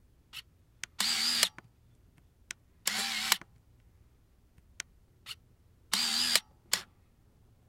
Minolta 600si focusing
Minolta 35mm camera with a 135mm lens attempting to focus in a dark room. Small servo motor sound. Recorded with AKG condenser microphone to M-Audio Delta AP soundcard